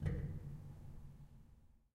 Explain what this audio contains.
Pedal 03-16bit
piano, ambience, pedal, hammer, keys, pedal-press, bench, piano-bench, noise, background, creaks, stereo
stereo, keys, noise, hammer, ambience, pedal-press, piano-bench, pedal, creaks, background, bench, piano